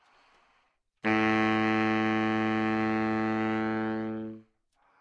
Sax Baritone - A3
Part of the Good-sounds dataset of monophonic instrumental sounds.
instrument::sax_baritone
note::A
octave::3
midi note::45
good-sounds-id::5536
baritone, good-sounds, sax, single-note, multisample, A3, neumann-U87